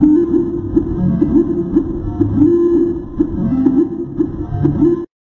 beat with kaoos